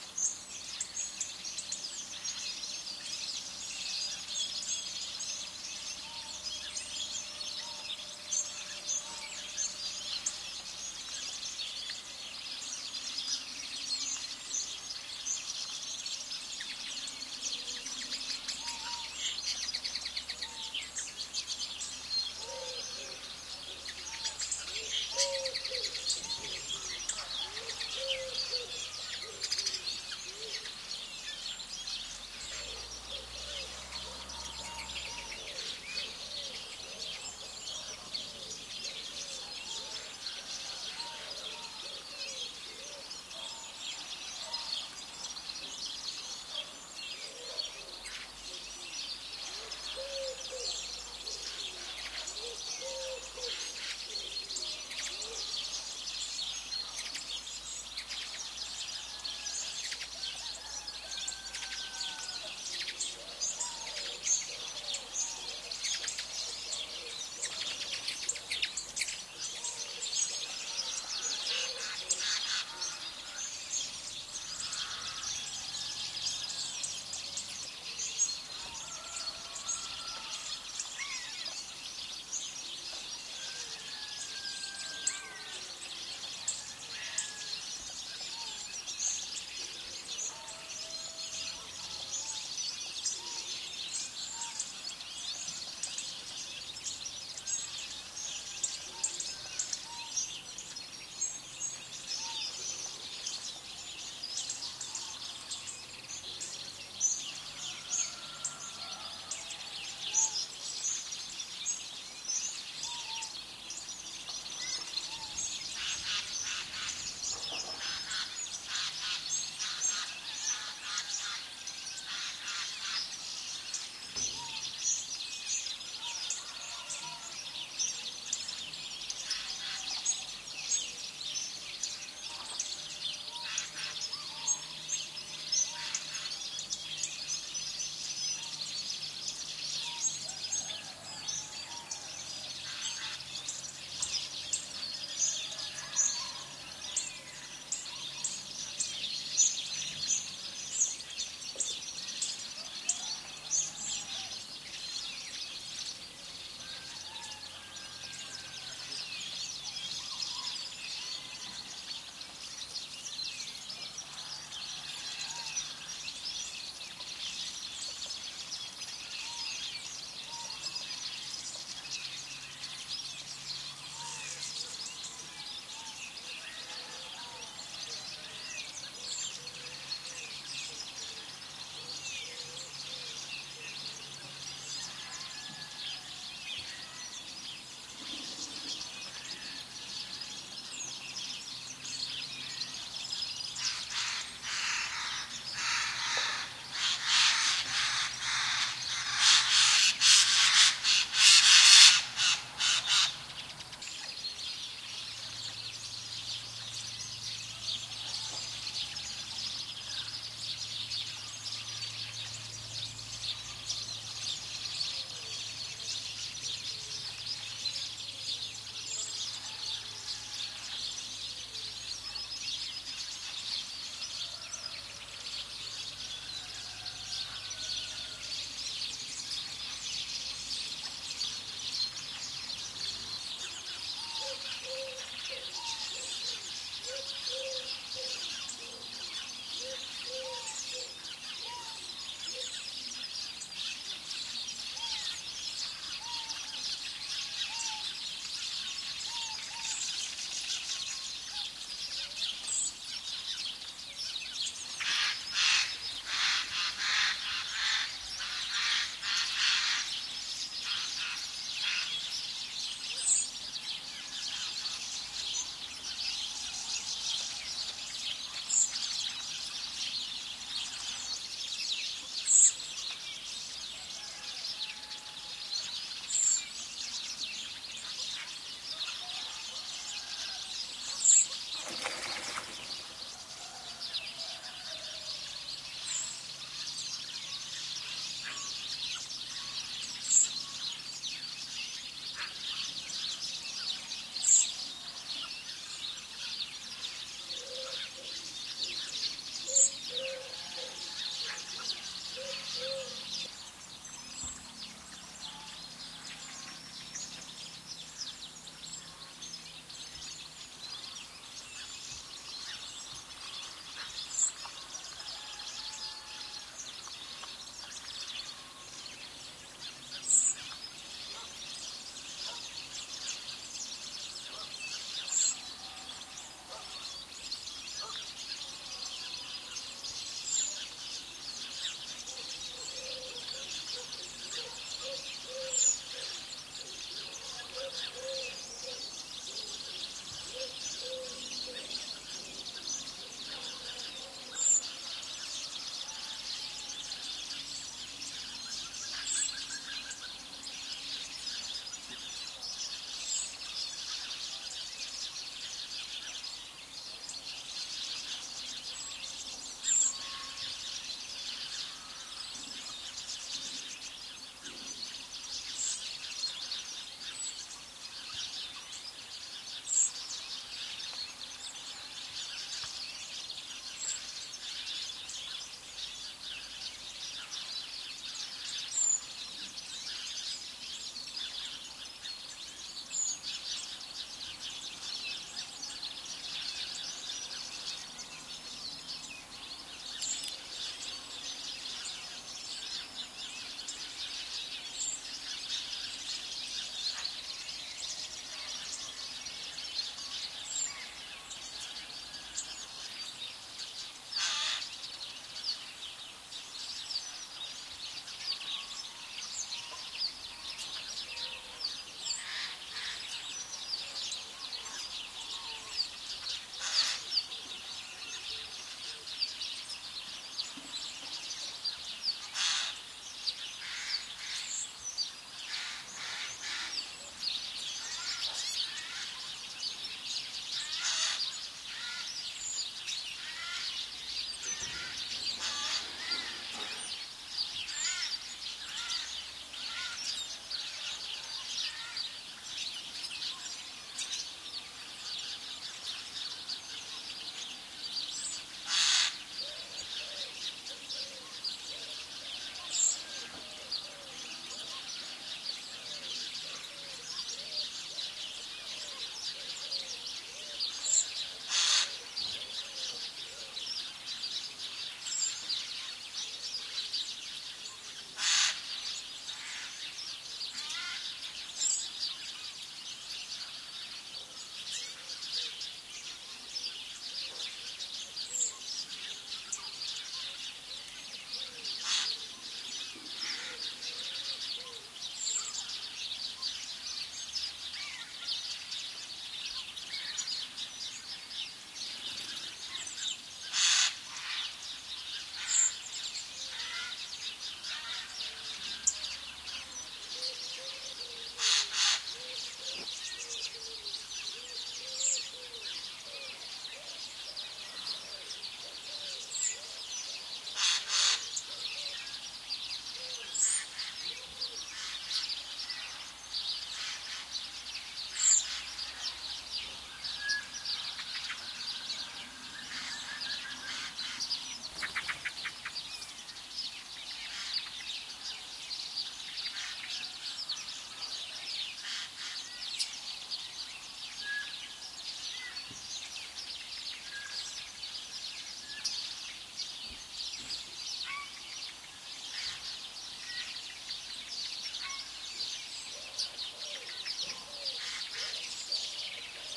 birds singing in my garden in february. I had to edit(cut out) the sound a bit to get rid of some car noises in the background. And I used a bit highpass filtering to get rid of unwanted noises.
ambient, singing, birds, ambiance, spring, bird, germany, garden, field-recording, nature